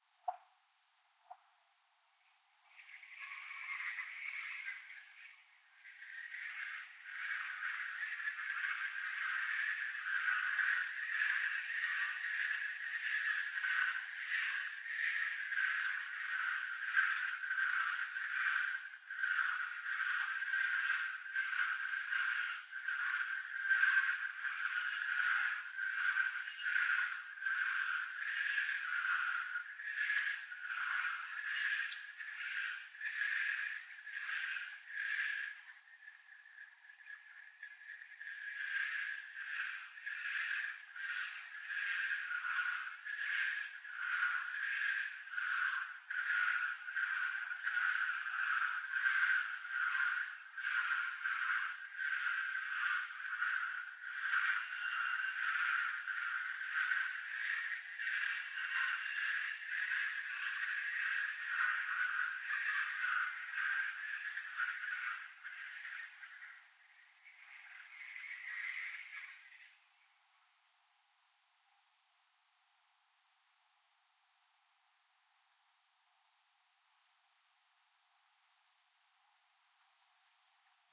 240731 the-semen-incident creepy-breathing Cleaned
I took the_semen_incident's file "creepy_breathing" and removed a bunch of noise from it.
breathing, ghostly, evp, creepy, ghost, whistling, lo-fi, wind, spooky